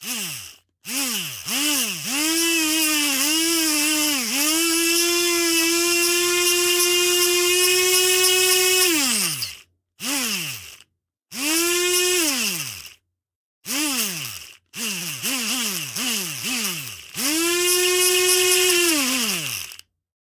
Blender / Mixer
Mono recording of a small cooking blender that, after some pitching and processing, I used as a layer for a robot's movement mechanism.
Gear: Oktava MC 012 -> JoeMeek VC1Qcs -> Audiofuse interface